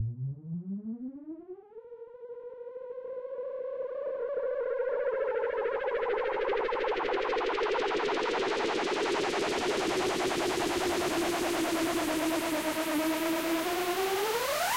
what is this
syn whoosh abrupt end 04
A buildup Whoosh!
buildup, effect, fx, sfx, whoosh